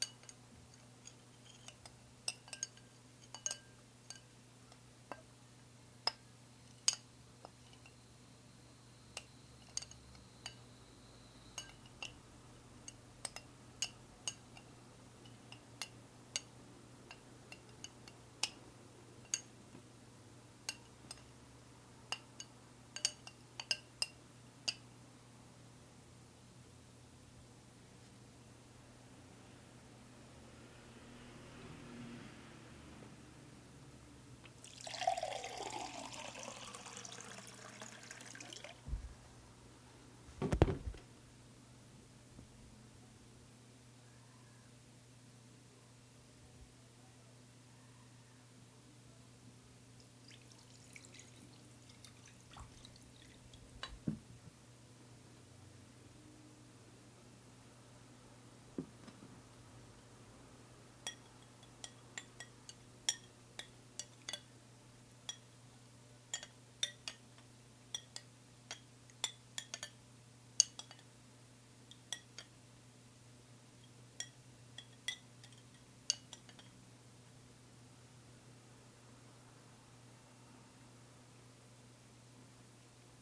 dish and pouring
Here is a clip I recorded using a Canon GL1 and a Audiotechnica shotgun mic
(unsure of model number, a cheap one I'm sure). The clip contains the
sound of me clinking a fork around inside of a bowl (sounds like
someone eating) and pouring water into a glass as well as a bowl. The
gain might need to be increased as the audio is low.